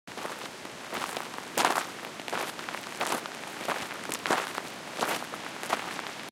Walking on gravel on a hike with wind in the background.
Recorded with a Zoom H4n.
Thank you for using my sound!